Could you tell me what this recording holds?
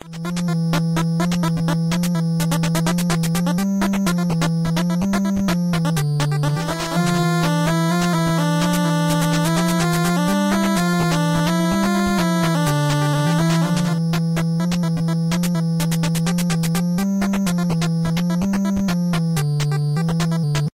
8-Bit Bossfight
This is my 8-Bit-Bossfight Music. I made this with FamiTracker.
This loopable Music is good for 8-Bit games or just for listen.